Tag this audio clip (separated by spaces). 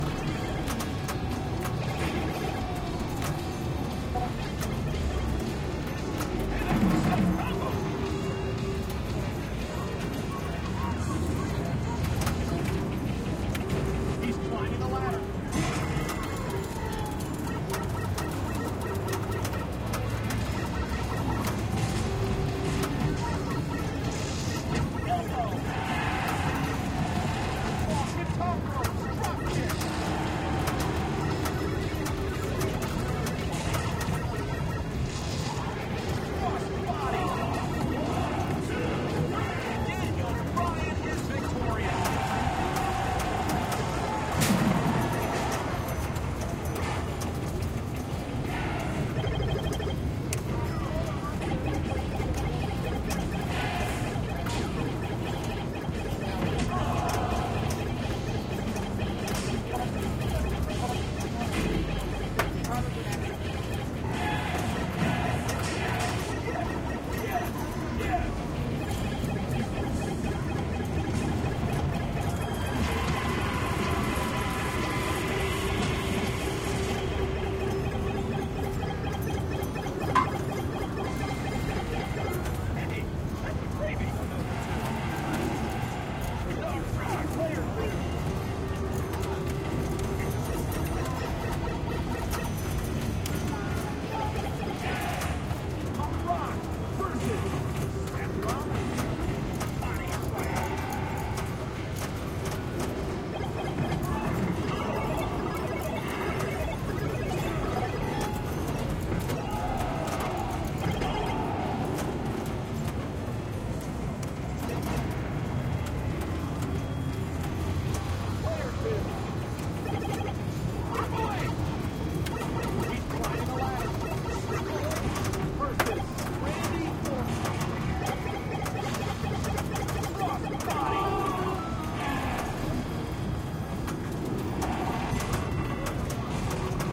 90s ambiance arcade field-recording game gaming pinball